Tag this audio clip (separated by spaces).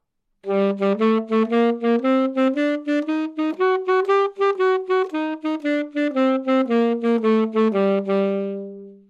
alto Gminor good-sounds neumann-U87 sax scale